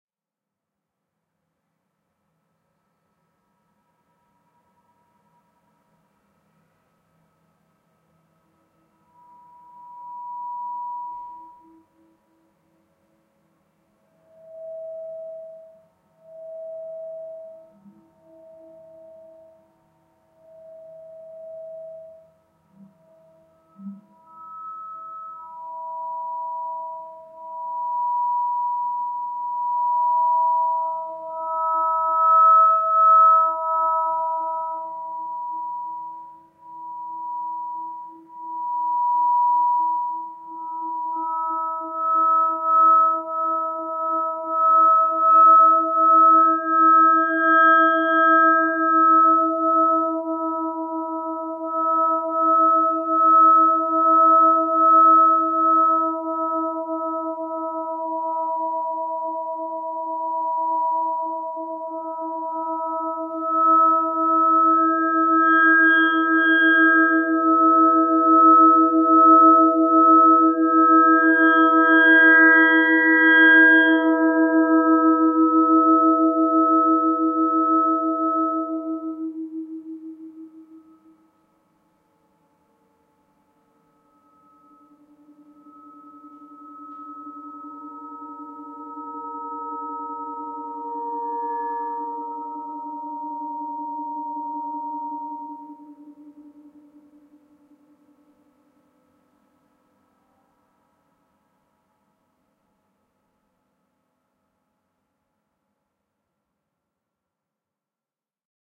dreaming SMETAK - 28.08.2015; ca. 17:50 hr
"dreaming Smetak" is a sound installation for 36 microtonal, aeolian, acoustic guitars based on the original idea and concepts of the composer Walter Smetak (1913-1984). It was a commission of the DAAD Artists-in-Berlin Program for the mikromusik - festival for experimental music and sound art. It took place in the attic of the Sophienkirche in Berlin and was opened for visitation between the 27th and 30th of August 2015.
For this version of it, 18 acoustic guitars were used as active sound sources while other 12 served as loudspeakers – mounted with small transducers –, and the remaining 6 were simply placed as visual objects in contrast to the ironmongery structure of the attic ceiling of the church. The first group of guitars were equipped with contact microphones attached to specially designed preamplifiers. Their sound actor was only and solely the wind.
acoustic-guitar, Aeolian-harp, Aeolian-sound, sound-art, sound-installation, Walter-Smetak